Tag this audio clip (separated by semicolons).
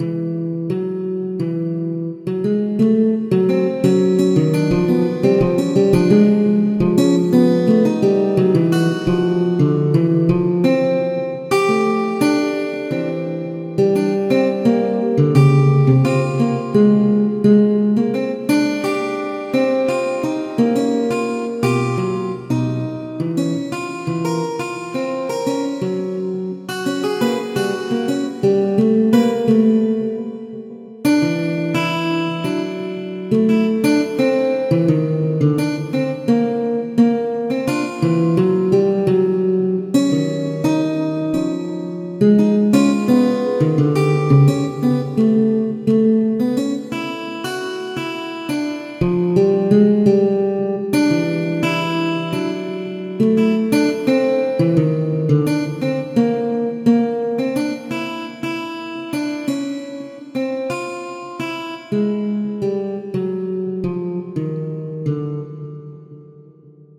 guitarhip; hop; song; soul; sample; RB; beat